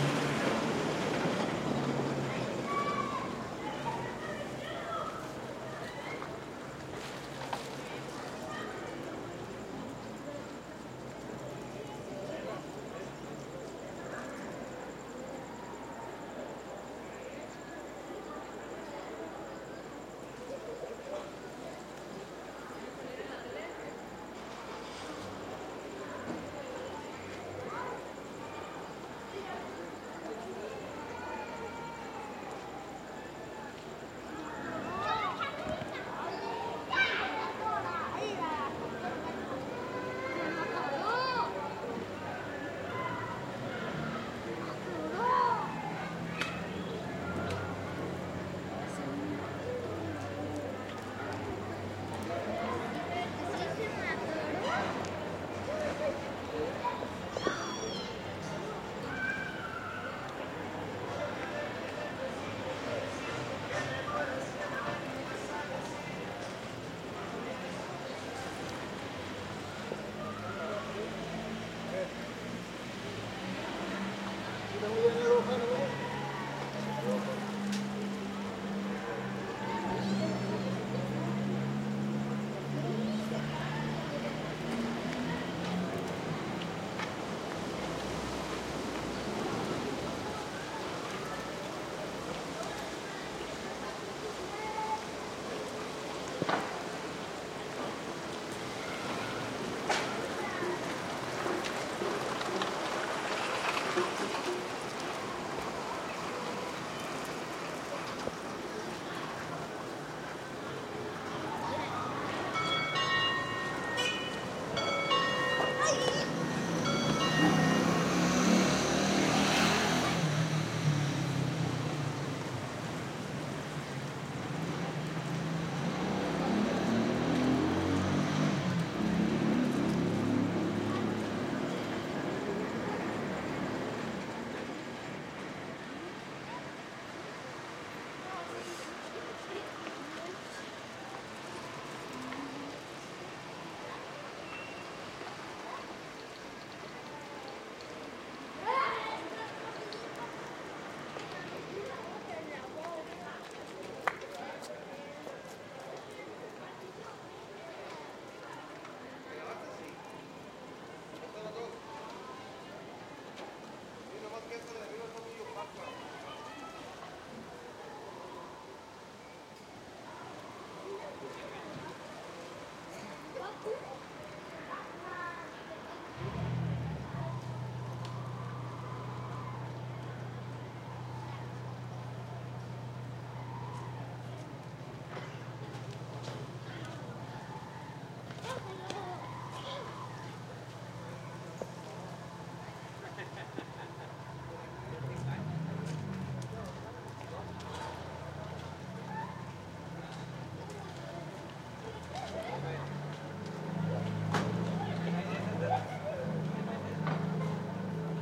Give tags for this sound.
crow park field-recording

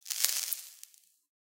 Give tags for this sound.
crumble cave scatter litter dust gravel agaxly dirt

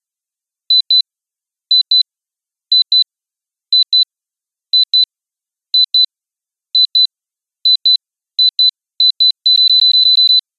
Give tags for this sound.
time beep flash bloop pixar bomb bleep incredibles tick

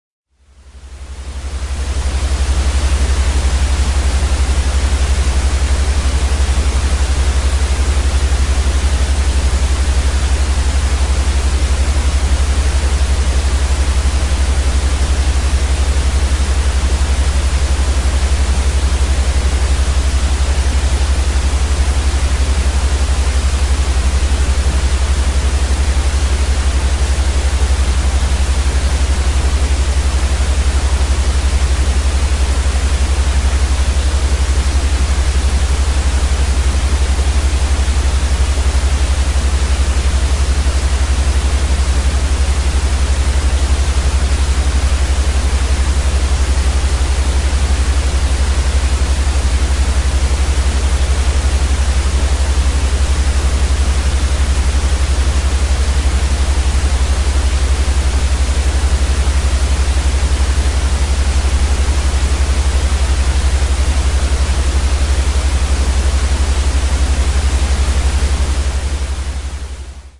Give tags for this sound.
hum noise white

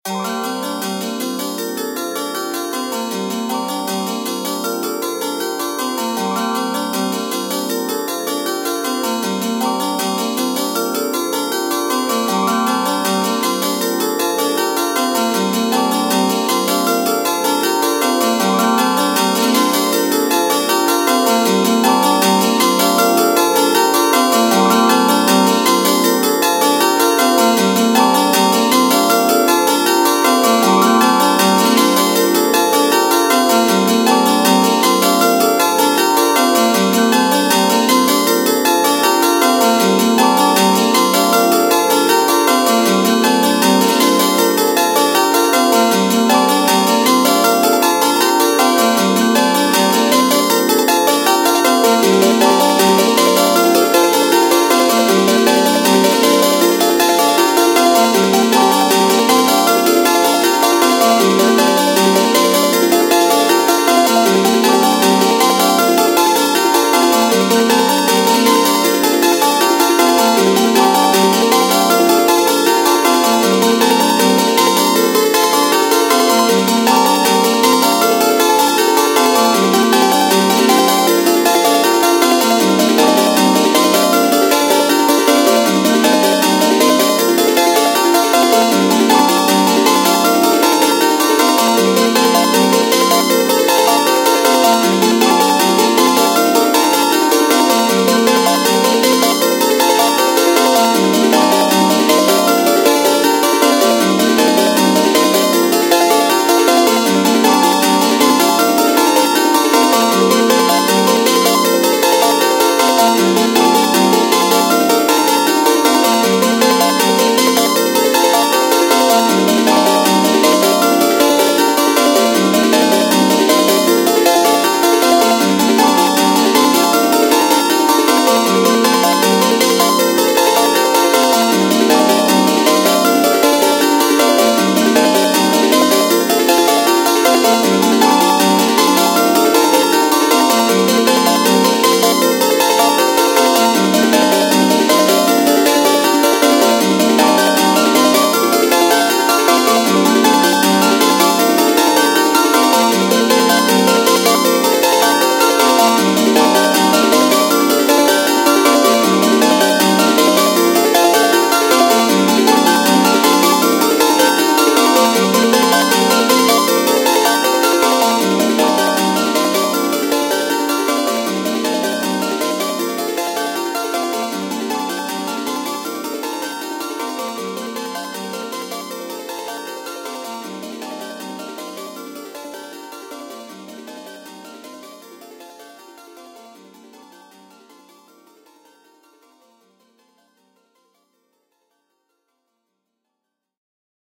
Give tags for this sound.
98 DX7 Loop trance

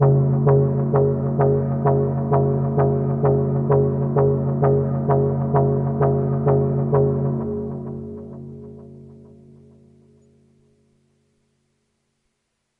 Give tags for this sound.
emulation pad rhythm sea

